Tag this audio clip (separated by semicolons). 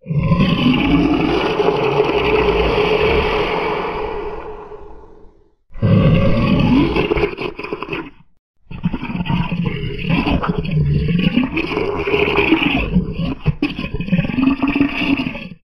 Animal; Creature; Demon; Dragon; Growl; Growling; Monster